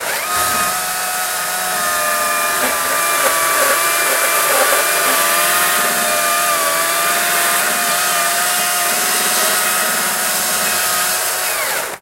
Chainsaw Stihl MSA 200 C
Cutting big piece of wood with the battery powered chainsaw from Stihl.
chainsaw, battery, battery-powered, electric, stihl, kettensaege, wood